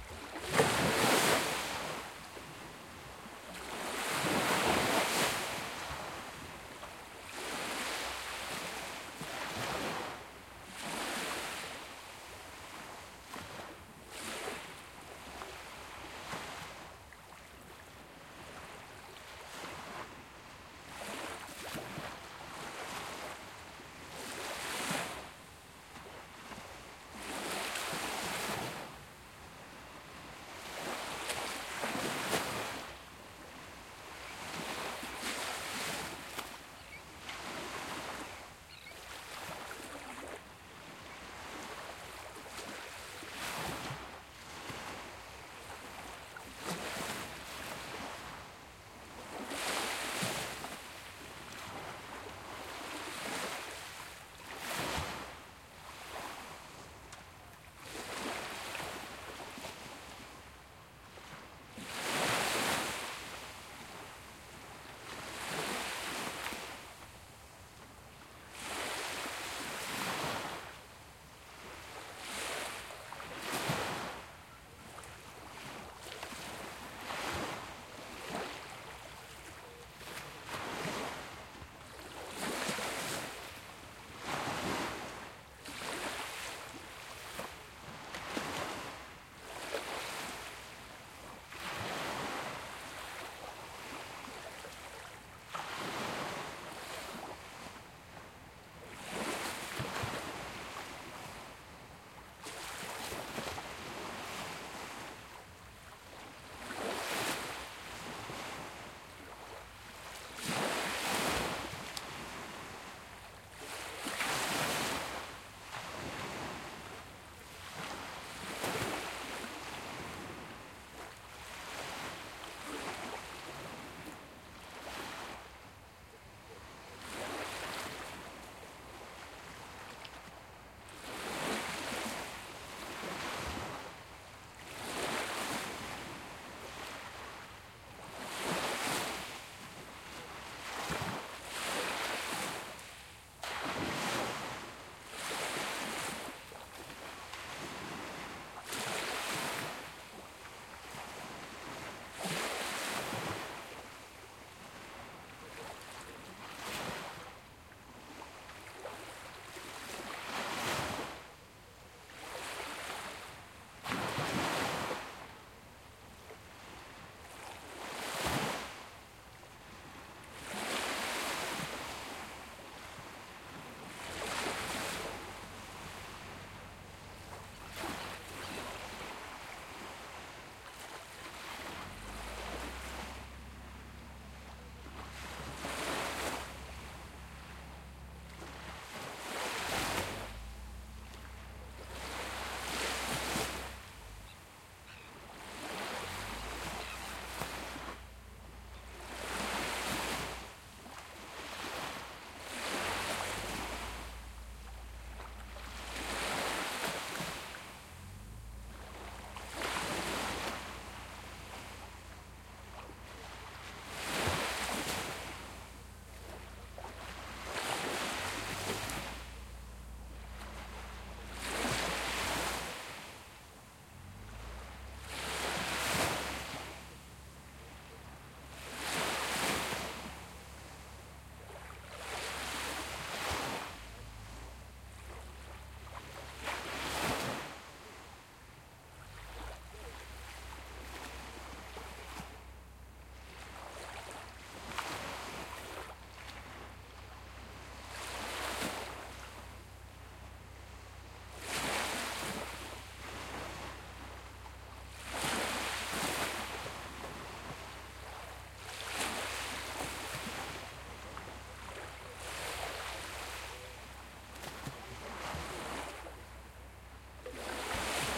black sea morning 130519
Recording of the Black Sea, in Sunnybeach/Bulgaria.
Recorded in the mornings without people in the background (some very subtle motor comes into the scene at some point)
morning, field-recording, waves, water, beach, sunnybeach, silence, coast, seaside, bulgaria, nature, black-sea, ocean, sea, shore